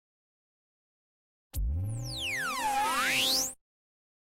Time-Mahchine Up:Short
Edited, Free, Mastered